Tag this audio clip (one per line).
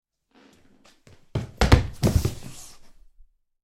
fall
person
thrown-off